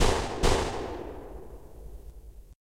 gun shots sfx
2 shots fired in succession
gun-shot, 2-shots, gun, shoot, shots